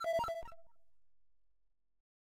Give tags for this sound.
message-recieved
mobile
new
sound
new-message
cell
phone

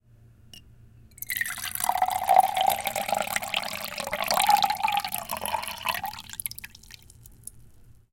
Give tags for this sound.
University
Point
Field-Recording
Koontz
Elaine
Park

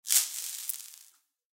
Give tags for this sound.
agaxly
cave
crumble
dirt
dust
gravel
litter
scatter

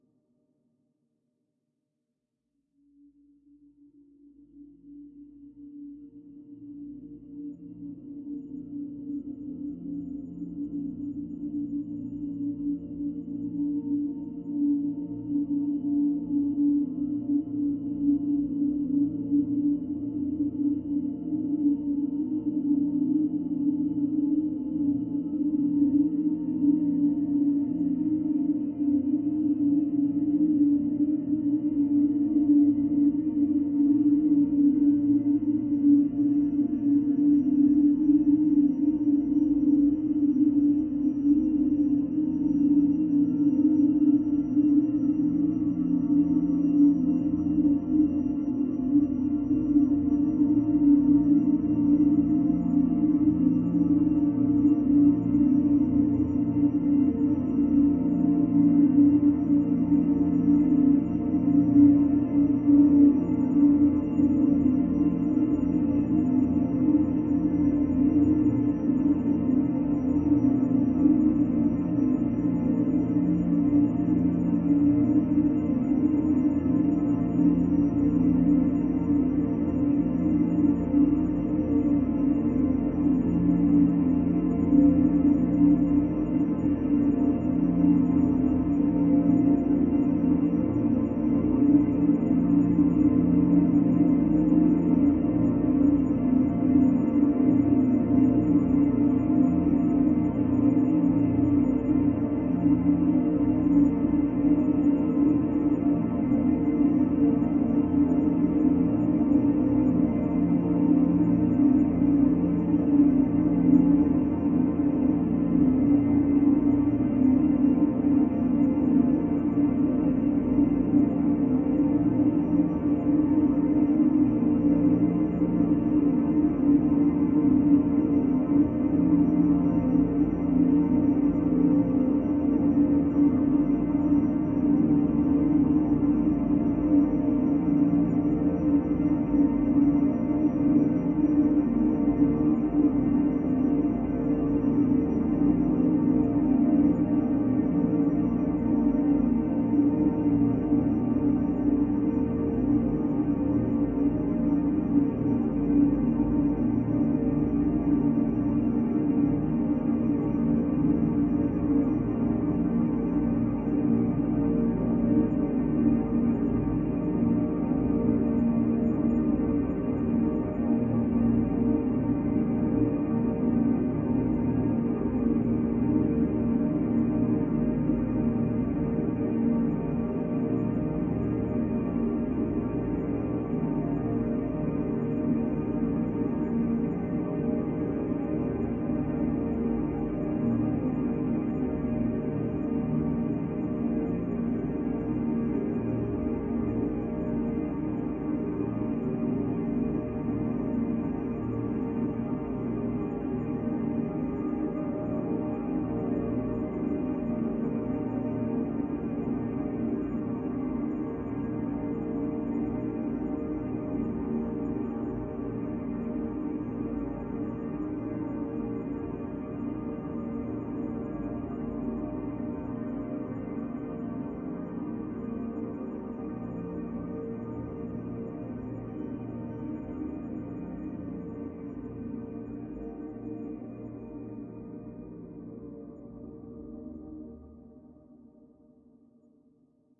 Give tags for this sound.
pad
sweet

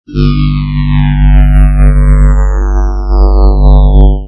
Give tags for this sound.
bass
processed

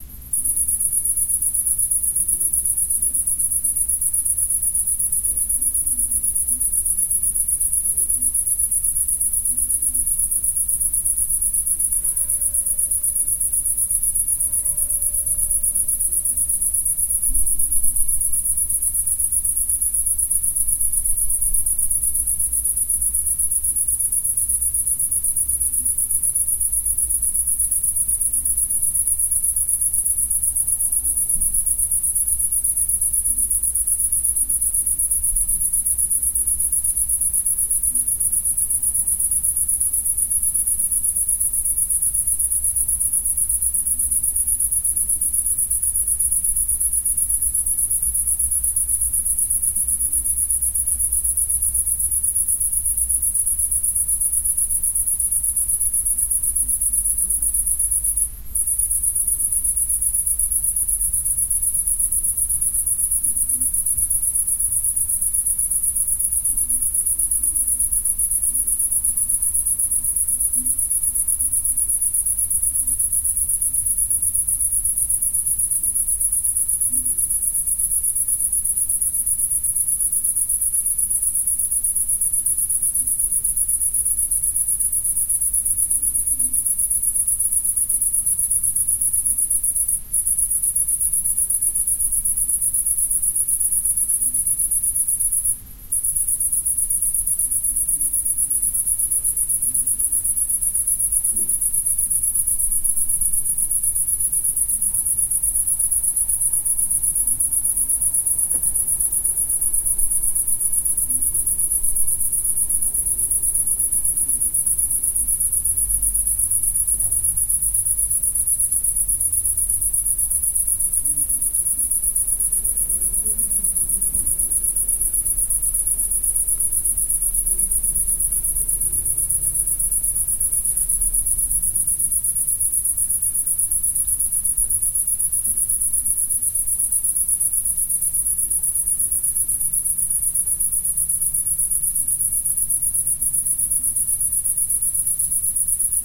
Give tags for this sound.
ambience
insects
crickets

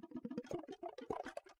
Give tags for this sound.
howie
noise
pad
sax
smith

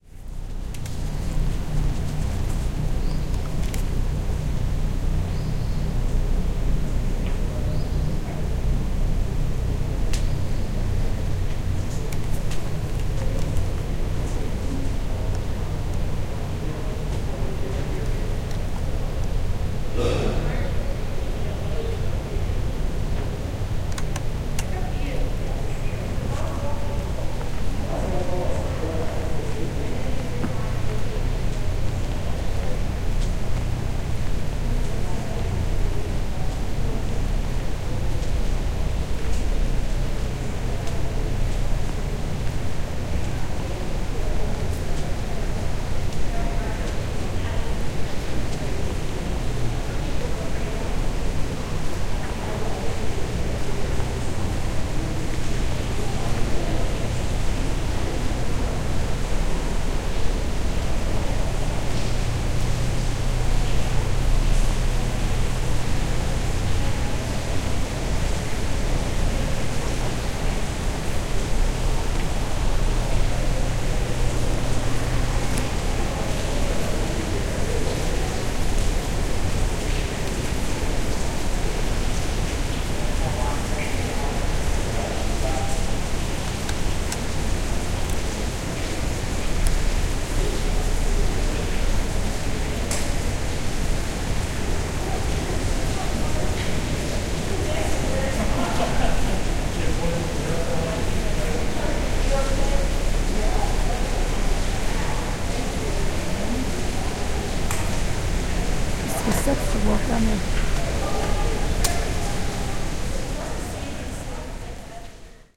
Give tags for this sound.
ambience
conservatory
garden
longwood

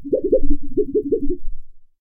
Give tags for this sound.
effect
wha
dance
percs
SFX
drum
plastic
percussion-loop
pad
groovy
hit
board
wobble

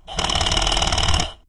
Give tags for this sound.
mechanical
sound-effects
tools

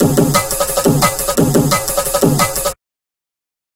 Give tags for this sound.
break
breakbeat
loop
jungle
dnb
amen
drum
beat
drums

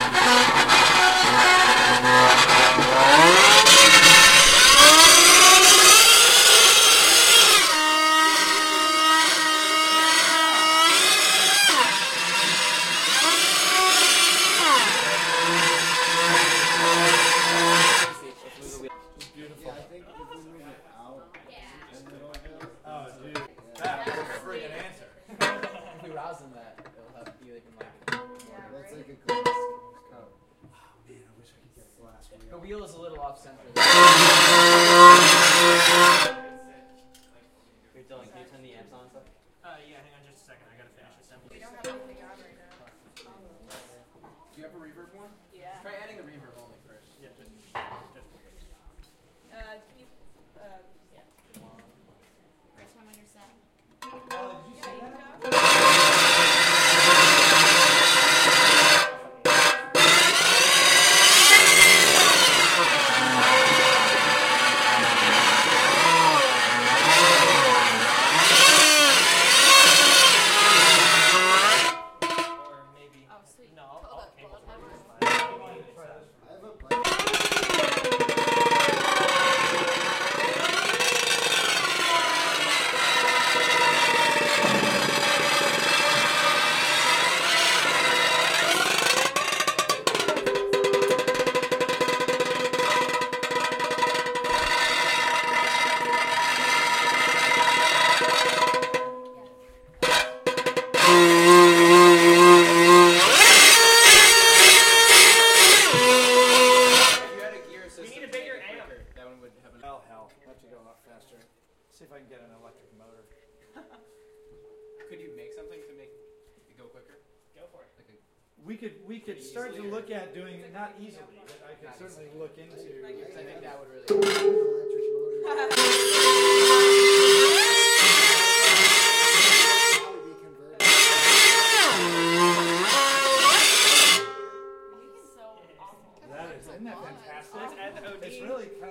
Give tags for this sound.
Russolo
futurist
Intonarumori

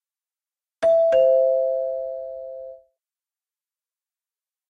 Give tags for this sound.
Bell
Bells
dingdong

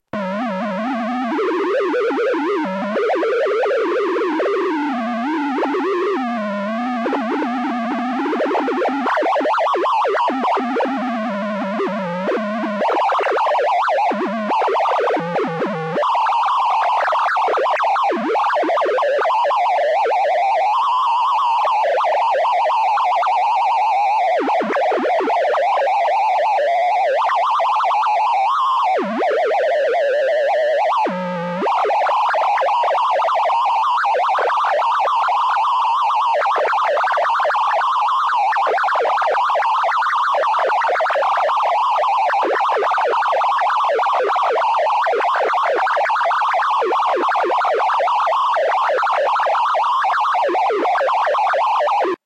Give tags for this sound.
color-blind
glass-of-water
ripples
modulated-light
Assistive-technology
playing
light-probe
jiggle
buzzy
fm
water
blind
frequency-modulation
wobble
60hz
light-to-sound
accessibility
wiggly
wiw
color-detector
modulation
wibble
experimental
electronic
tone

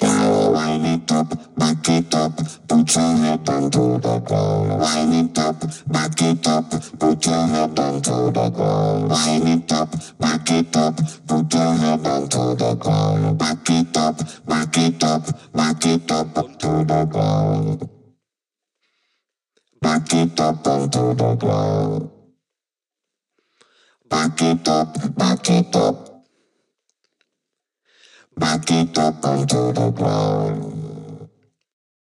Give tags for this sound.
112
bpm
dancehall
funky
melody
moombahton
singing
vocal
vocoder

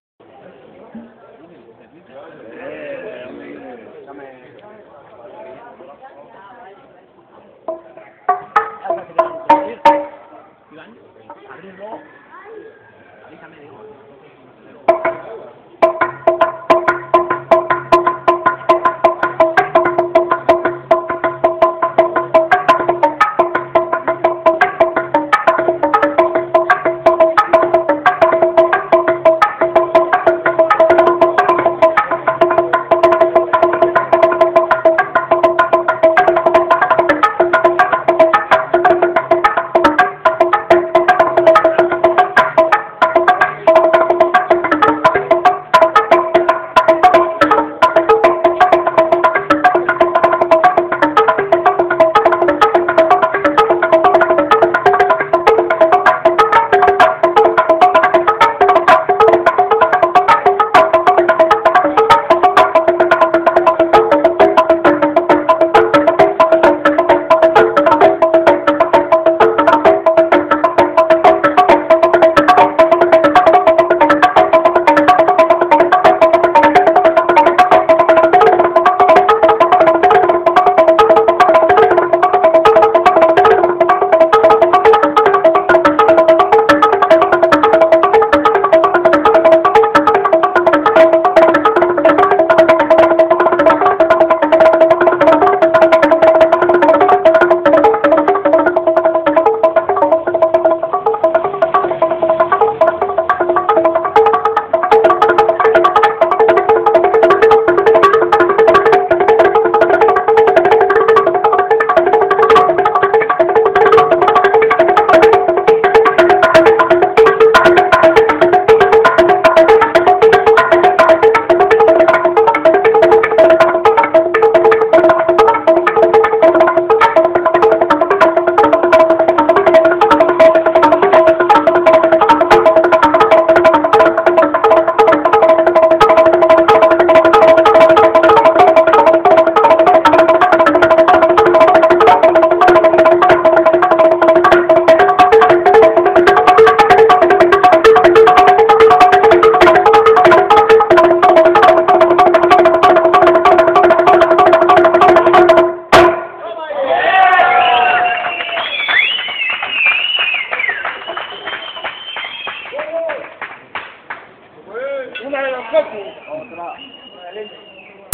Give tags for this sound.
herria
gente
sound
euskal
country
vasque
people
pais
ancient
txalaparta
vasco

